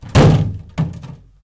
Plastic, jerrycan, percussions, hit, kick, home made, cottage, cellar, wood shed
hit, cellar, percussions, wood, home, jerrycan, cottage, Plastic, made, kick, shed